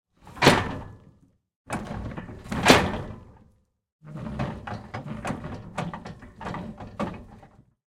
mechanic old cog chain medieval gate metal wood impact m10
Interacting with an old wooden/metal made construction. Recorded with Sony PCM m10.
medieval, impact, mechanic, gate, wood, chain, metal, cog, interaction